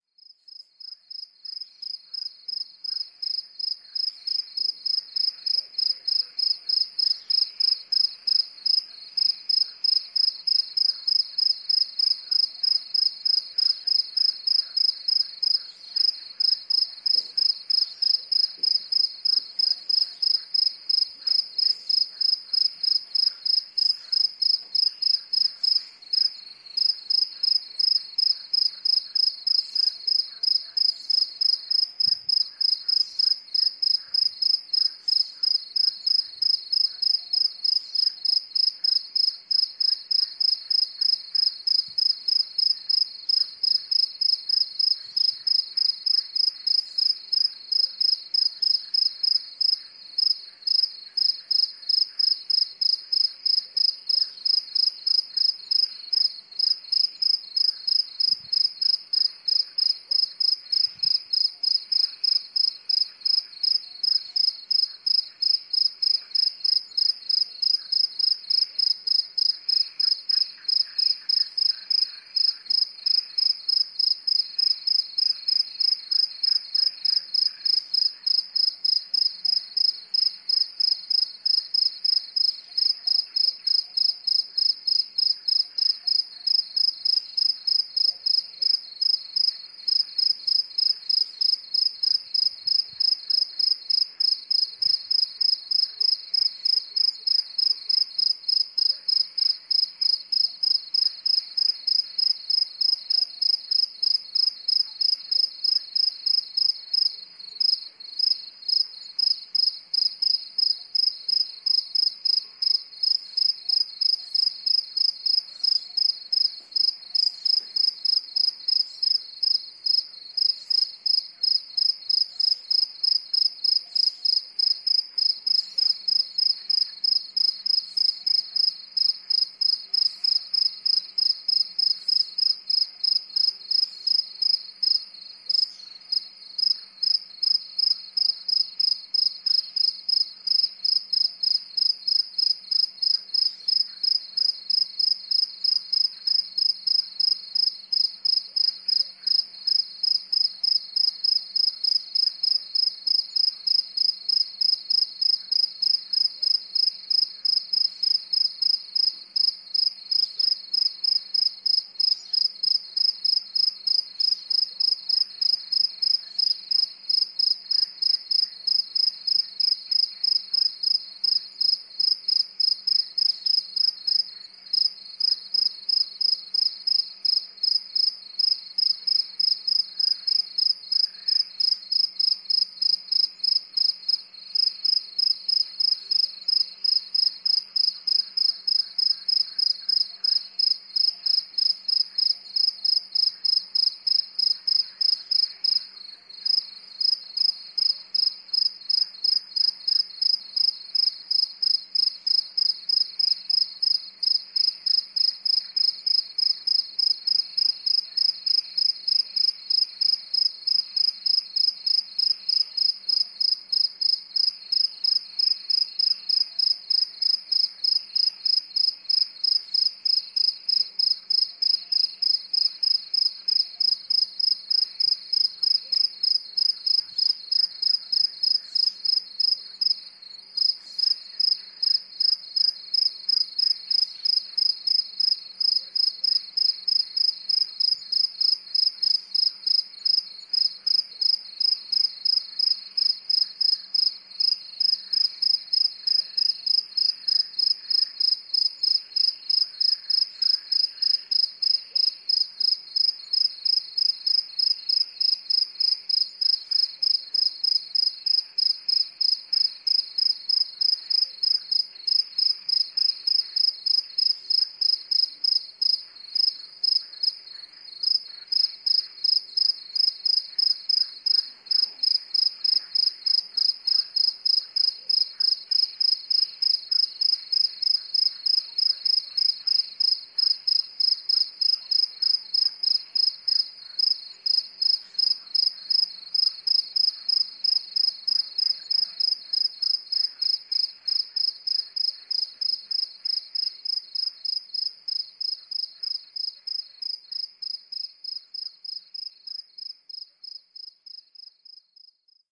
20160526 night.calm.12
Warm spring night ambiance, with crickets chriping at 'medium' speed. Primo EM172 capsules into FEL Microphone Amplifier BMA2, PCM-M10 recorder. Recorded near Torrejon el Rubio (Caceres, Spain)
ambiance, chirp, cricket, field-recording, insects, nature, night, spring